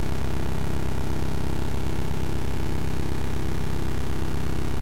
A fart that I maked for my GBJam entry, Guac-A-Mole.